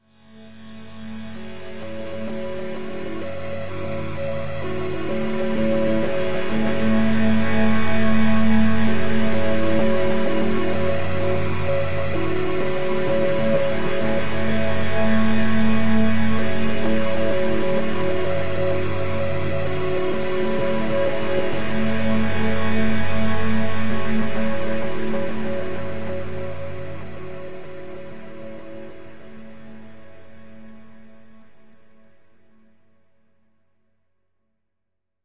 One in a small series of chords and notes from a digital synthesizer patch I made. A little creepy perhaps with some subtle movement to keep things interesting.
quiet, ambient, digital, atmosphere, sound-design